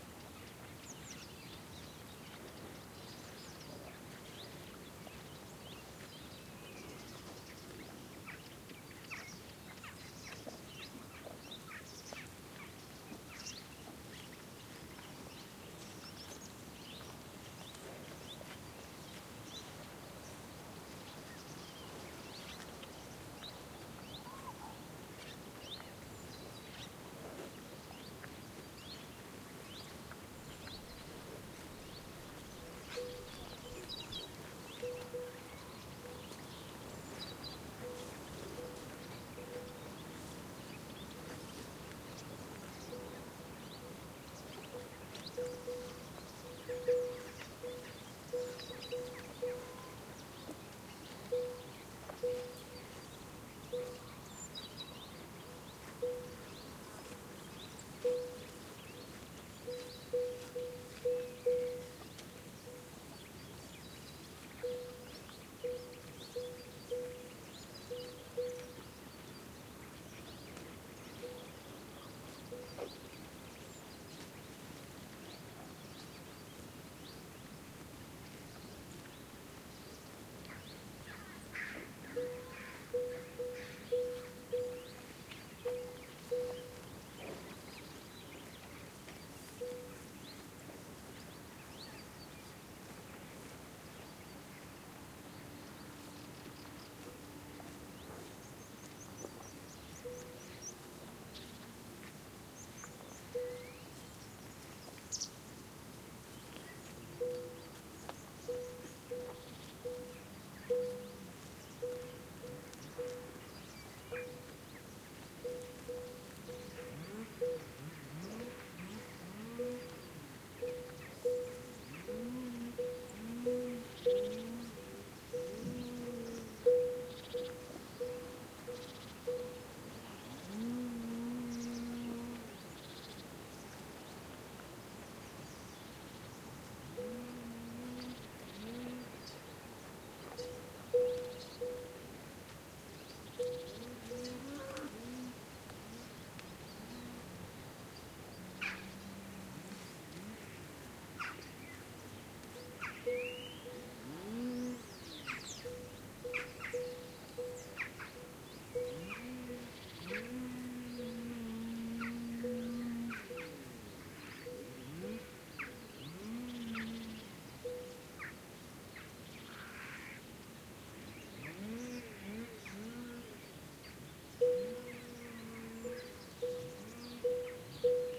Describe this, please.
sunny morning sounds in the countryside, including bird calls, distant vehicles, cowbells, etc